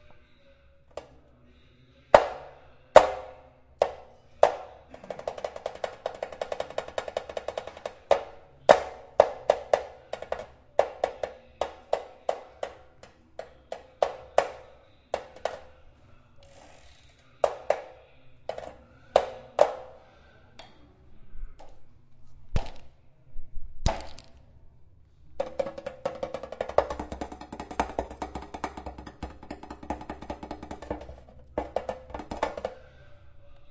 Human Bike Sound Archive.
A Bicycle it's a musical instrument. Like a drum kit.